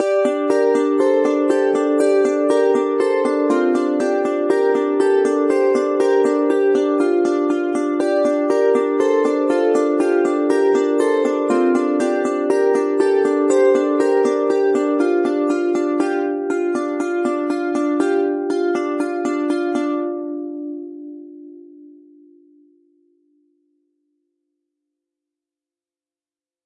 plucked harp2
plucked-harp, synthesizer, harp, stringed, plucked-electronic-harp, electronic, electronic-harp